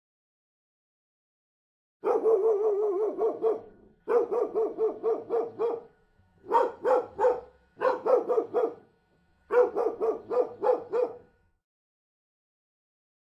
Barking m dog
Medium sized dog faster barking. Dog barking behind the fence, barks at passersby.
Recorded with Zoom H6 recorder. The sound wasn't postprocessed.
Recorded from a distance on windy afternoon in my garden in Mochov. Suitable for any film.
Medium-sized, Dog, Barking, rychl, rychle, Pes, Czech, Panska, Stekani, Pet, CZ, stredni, Pansk, Fast